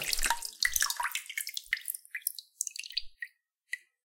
small water droplets
Pulling a wet bottle out of water.
binaural, drip, dripping, drops, emerge, water, wet